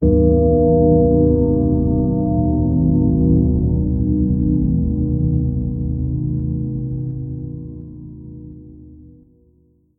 A mysterious bell sound- mono 10:00sec.